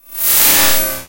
noise effect 2
effect created from white noise